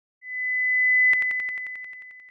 This is a sound effect I created using ChipTone.